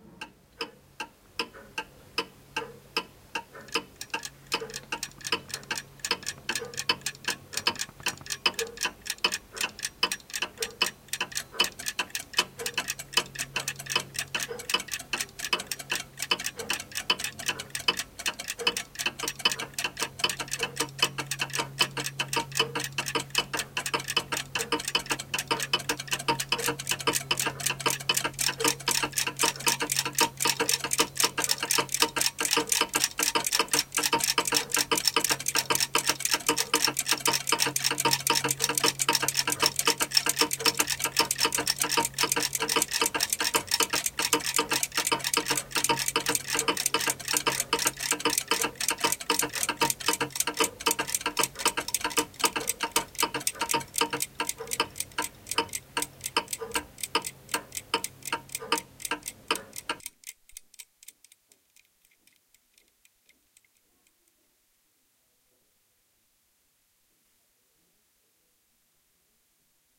20090405.tictac.mix
tic, anxiety, clock, tac, machine